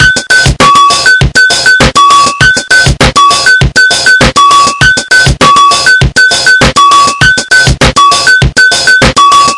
samba drum-loop sampled from casio magical light synthesizer